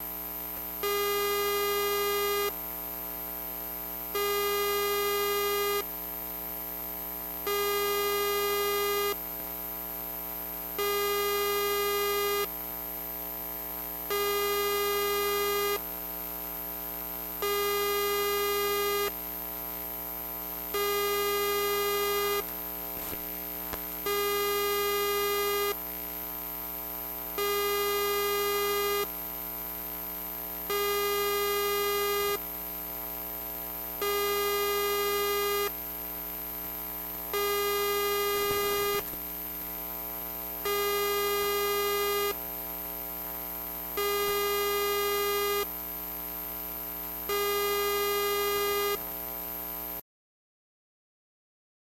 External hard drive electromagnetic sounds
LOM Elektrosluch 3+ EM mic